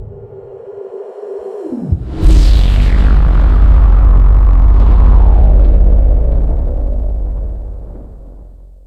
Sub Killer
Great sound I played around with.
Could be used for suspense in movies,games etc. or could be a subwoofer test.
Enjoy!
killer, synth, super, subs, bass, sub